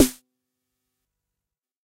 Snares from a Jomox Xbase09 recorded with a Millenia STT1